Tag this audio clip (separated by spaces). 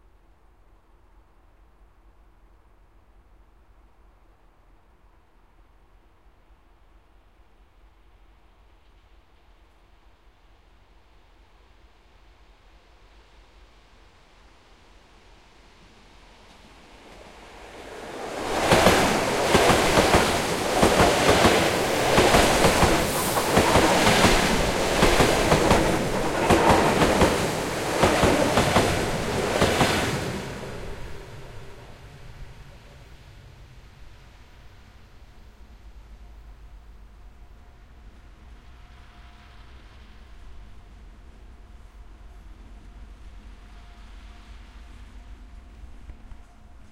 electric-train express industrial passage passenger-train passing-train rail rail-road railroad rails rail-way railway riding train transport wheels